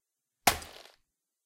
A weapon blow headshot.
headshot, blow, weapon, smash